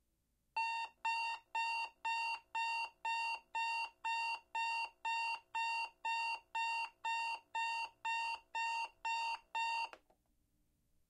Alarm clock beeping, distant perspective